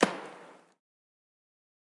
Burning arrow 04
Recording of a fire arrow being shot.